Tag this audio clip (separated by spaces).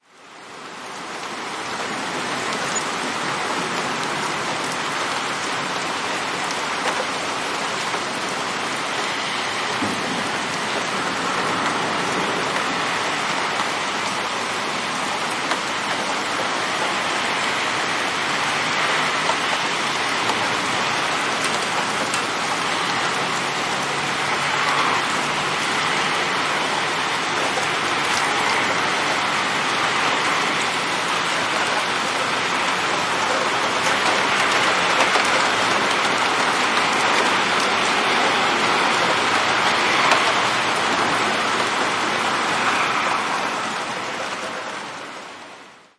cars city drops nature rain weather